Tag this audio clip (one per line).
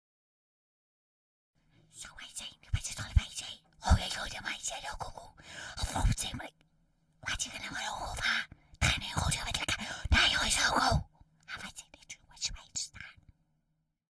fantasy
whispers